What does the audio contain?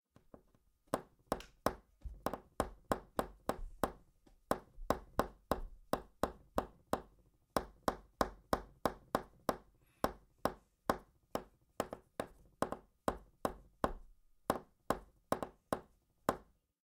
Tapping Pencil on Desk - Foley
Several seconds of tapping of pencil on desk